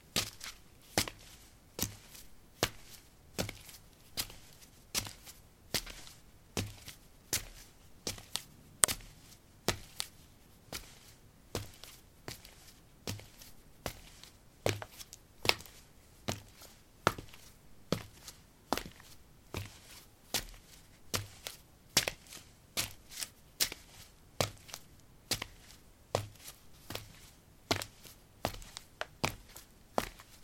paving 04a sandals walk
Walking on pavement tiles: sandals. Recorded with a ZOOM H2 in a basement of a house: a wooden container filled with earth onto which three larger paving slabs were placed. Normalized with Audacity.
footstep, step, steps, walk, walking